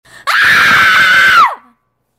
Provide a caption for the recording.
Scream RM
agony, anger, fear, girl, horror, pain, painfull, scream, screaming, shouting, woman, women, yelling